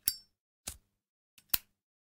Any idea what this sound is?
my lighter, sometimes certain sounds for post-production are hard to find
flame, gear, lighter